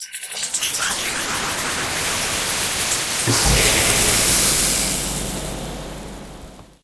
Dissolve metal spell
I used this sound as a rust spell dissolving someone's powered armor suit.
Combination of two sounds found on freedsound and mixed using audacity. I stretched and reverbed and probably other things.
disintegrate, dissolve, effect, game, game-sound, magic, magical, rpg, SFX, spell, wizard